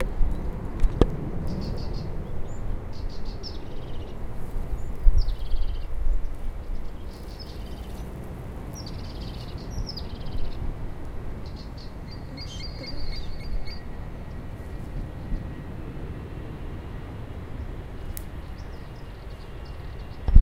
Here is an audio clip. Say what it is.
mallarenga emplomellada ed
We can heard a crested tit, delta of Llobregat. Recorded with a Zoom H1 recorder.